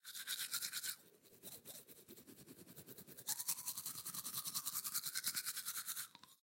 Short tooth brushing. Close recording.
Bathroom, Brushing, Close, Field-recording, Free, Tooth